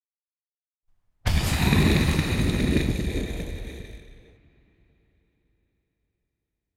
A collection of pitched and stretched vocal takes to replicate the sound of an explosion.